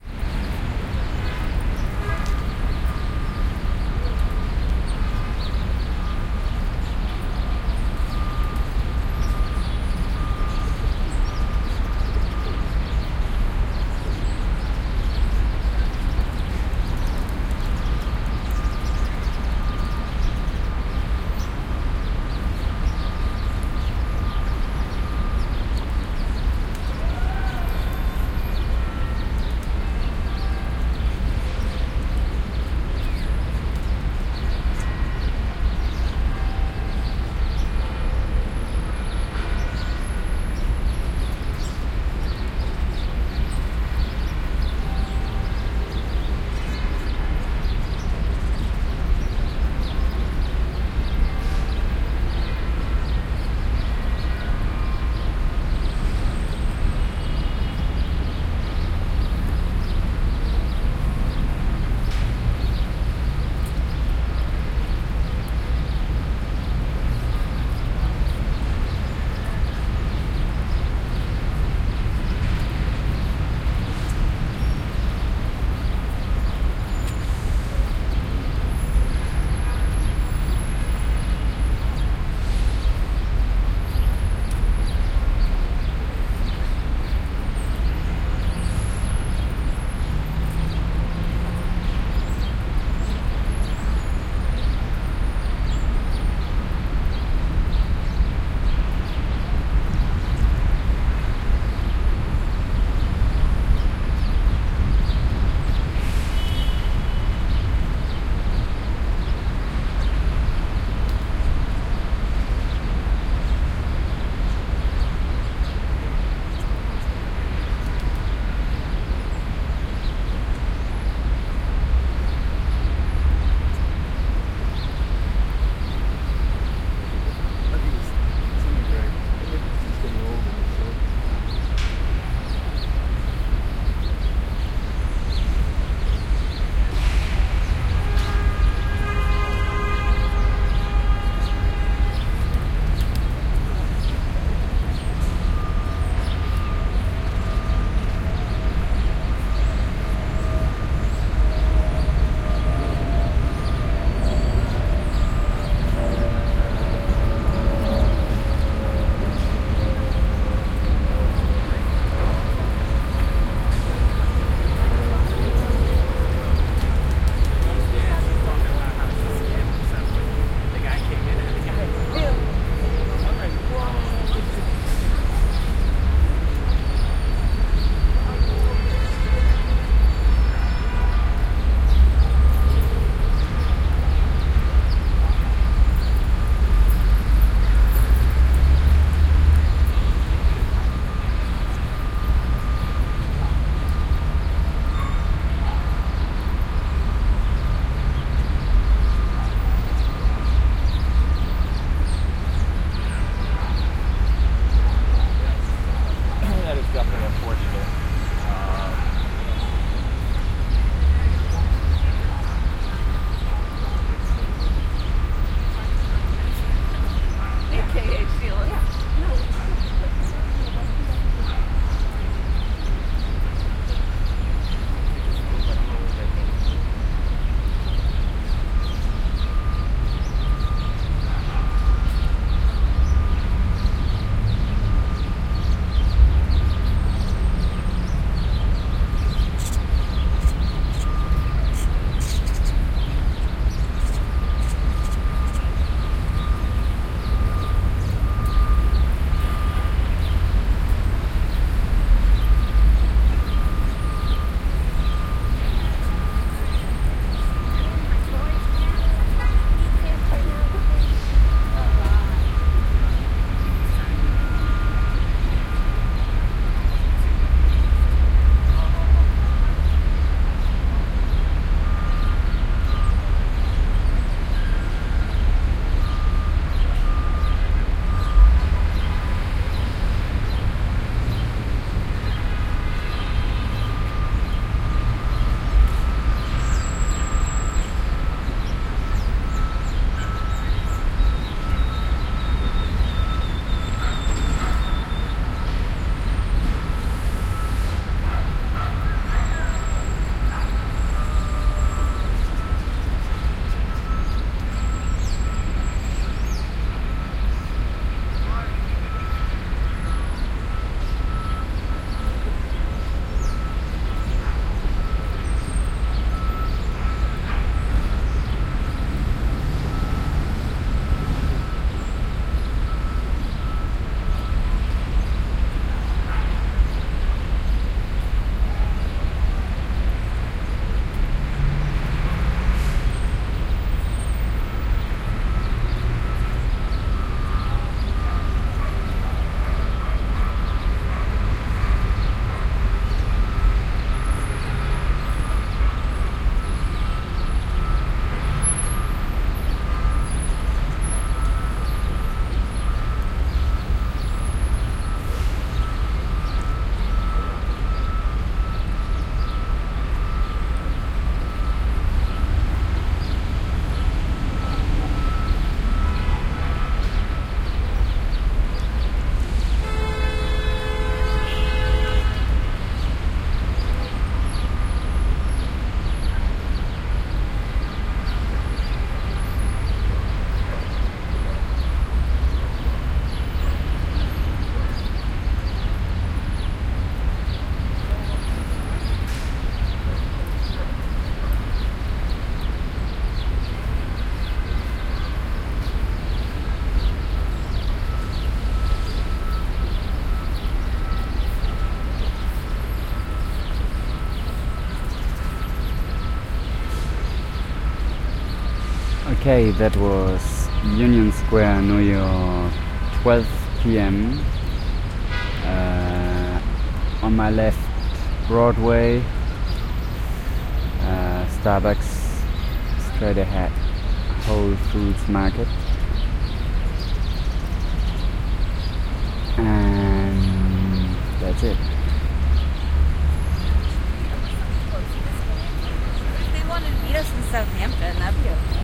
2100 AMB NewYork-Unionsquare 2015-04-17 1pm
OKM Binaural recording in New York Unionsquare
cars, city, field-recording, new-york, noise, nyc, square